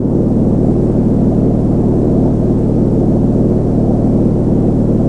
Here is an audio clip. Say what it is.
GND Drone
I was actually hunting for sounds that I could use for a "Chain Lightning" sound, but ended up making a short drone that i made from a ground hum.
Drone, hum, processed